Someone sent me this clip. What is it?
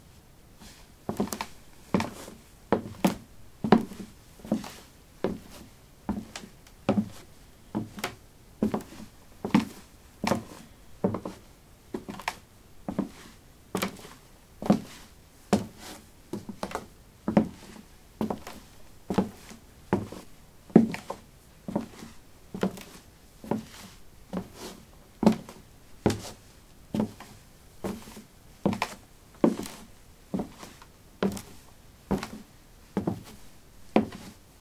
wood 06a ballerinas walk
Walking on a wooden floor: ballerinas. Recorded with a ZOOM H2 in a basement of a house: a large wooden table placed on a carpet over concrete. Normalized with Audacity.